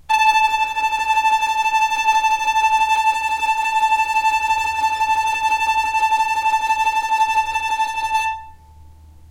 violin tremolo A4
tremolo, violin